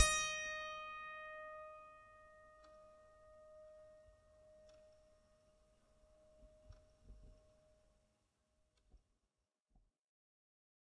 a multisample pack of piano strings played with a finger
fingered, multi, piano, strings